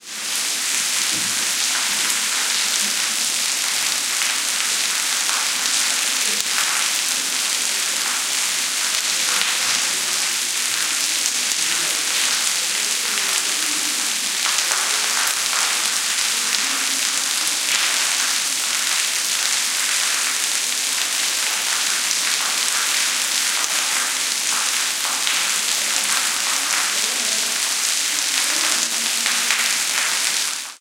20160415 rain.courtyard.10
Noise of rain falling on pavement. Recorded inside one of the many courtyards at Convento de Cristo (Tomar, Portugal). PCM M10 recorder with internal mics.
storm, thunderstorm, water